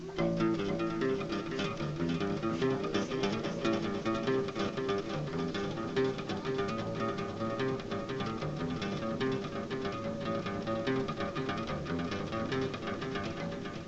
sguenkine son2
Connect a microphone. Open AUDACITY. Press Break and Register. Direct the microphone above the bass. select the piece. copy the selection. Open project new audio track. Stuck the selection. change the speed : 33,896. type of vinyl : 33 1/3 to n/a. echo : while of time limit : 0,500000. factor of decrease : 0,500000
bass, echo